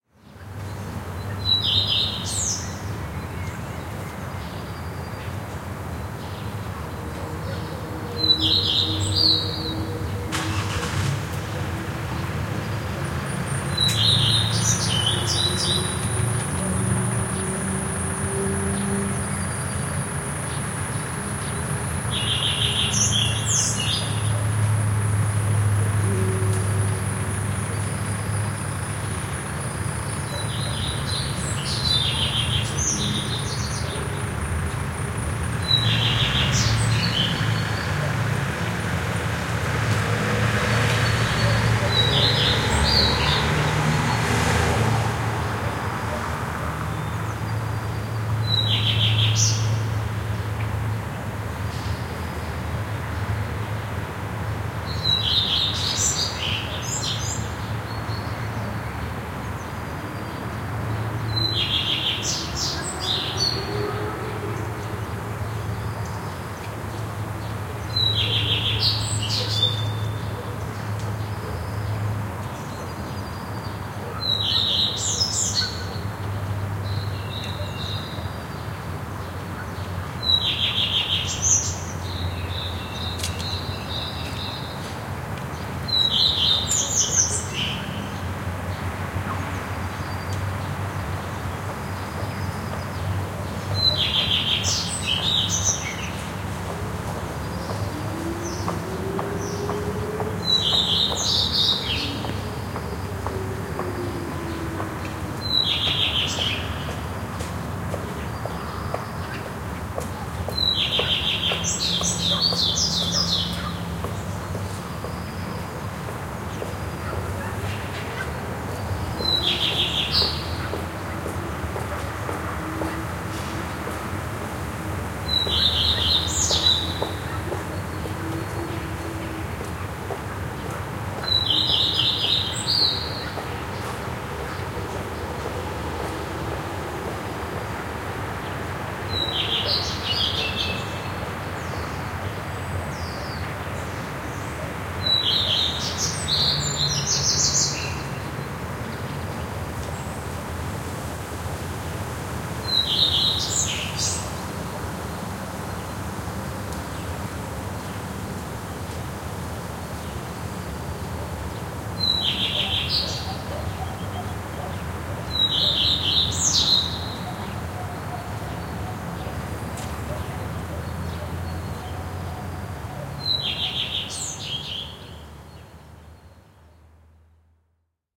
Recorded outside of my window using stereo set of microphones thru the fireface 400 interface. Done some editing. I hope You enjoy and use a lot !